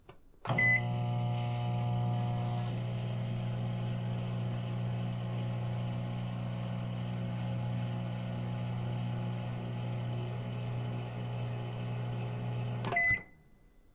sounds of a microwave
beep, machine, microwave